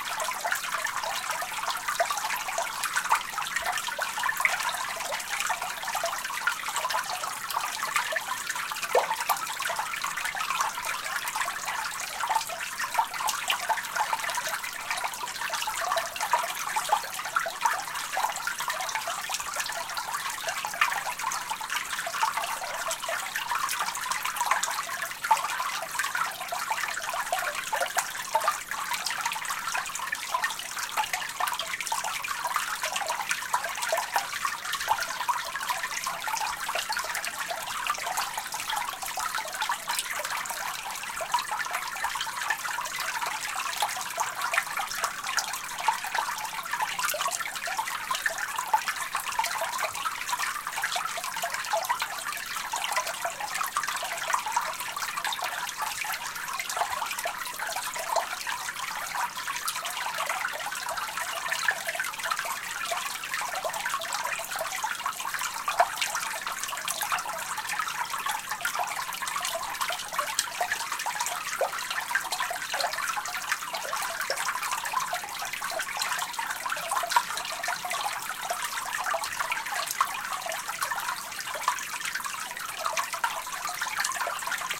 Stream Under Bridge
A loopable stereo field-recording of a stream recorded flowing under a bridge. Rode NT-4 > Fostex FR2-LE
water, brook, stereo, loop, stream, tinkle, nant, beck, river, field-recording, tarn